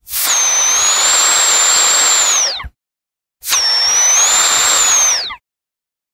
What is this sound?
Vaporizer Inhale
A vaporizer whistle, when you remove the finger from the hole to inhale the last bit of smoke. The person smoking was so nice to let me record this.
Recorded with Zoom H2. Edited with Audacity.